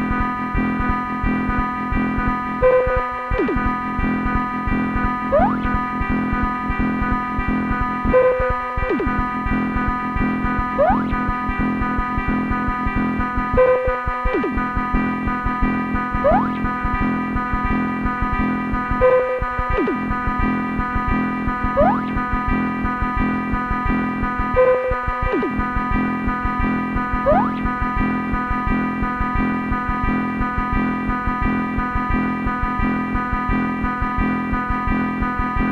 rythmn with slide
A rhythmic riff with portmanteau notes from a circuit bent SK-1